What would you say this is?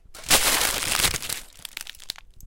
ns metalicCrunch
Crushing Aluminum foil, plastic and packing tape
crunch, crush, metallic